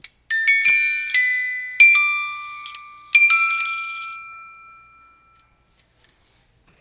Santa head music box winds down... recorded with DS-40.
bells, christmas, jingle, music-box